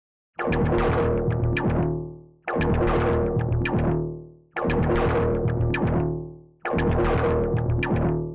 Heavy industrial bass loop (115bpm)
Loop made by putting a resonator and lots of other processing on the chopped up sound of dropping a pencil in a grand piano
wood, resonator, rhythmic, industrial, distorted, hard, bass, blocky, groovy, loop, percussive, pencil, techno